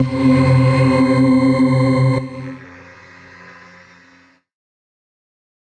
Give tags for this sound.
atmosphere
cinematic
dark
experimental
glitch
granular
industrial
loop
samples
space
vocal

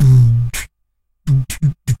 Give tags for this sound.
120-bpm
bassdrum
loop
rhythm
bass
boomy
beatbox
kick
Dare-19
noise-gate
boom

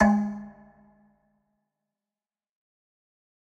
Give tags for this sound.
drum
god
home
kit
pack
record
timbale
trash

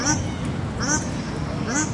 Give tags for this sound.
aviary
bird
birds
duck
exotic
field-recording
goose
honk
shelduck
tropical
zoo

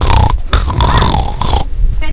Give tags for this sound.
Llobregat
porc
nature
pig
Deltasona
field-recording
joke

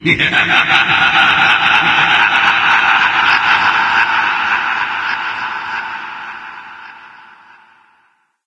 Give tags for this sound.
clowny
laugh

